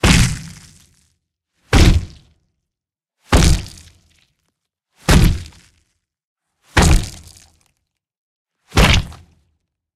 Magic Impact Body Hit
Body, Crash, Impact, Hit, Stab, Magic, Boom